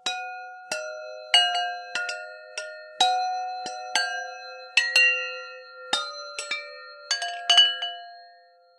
Chime sounds. Made by pitchshifting taps on wine glasses. Recorded onto HI-MD with an AT822 mic and processed.